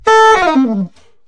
The first of a series of saxophone samples. The format is ready to use in sampletank but obviously can be imported to other samplers. I called it "free jazz" because some notes are out of tune and edgy in contrast to the others. The collection includes multiple articulations for a realistic performance.